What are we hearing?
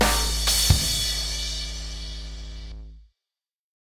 Beaty Slicey loopy thingy
A slice from a beat I created in Fruity Loops. Beyond original effects in the loop, no effects or editing was used. A left-over drum loop thingy.
sample, drum, slice, loop